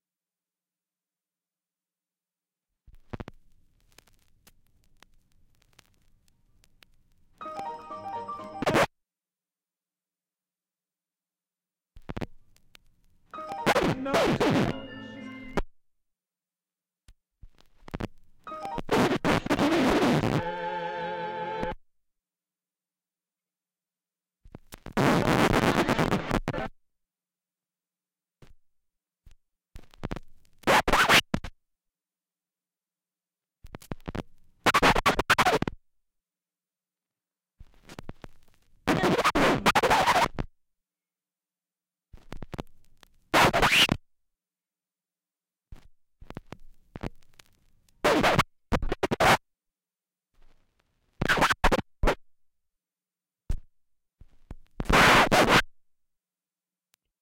Various record scratches